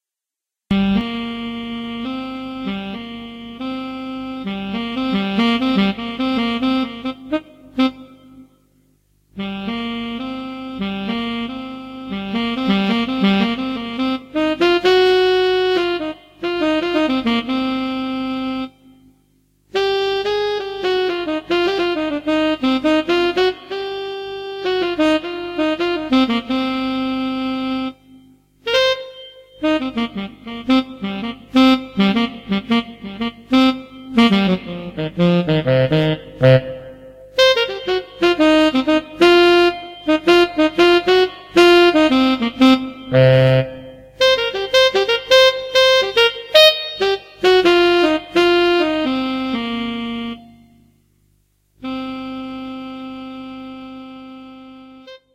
trumpet game over baby
gameover, jazzsaxophone, saxophone, street, saxofoon